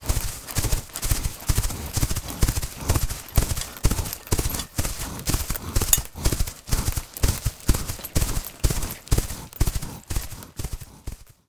SRS Foley Horse Galloping
Foley Performed, using the covers from a Rode Blimp as the horse shoes.
Animal, Creature, Foley, Gallop, Horse, Mammal, Running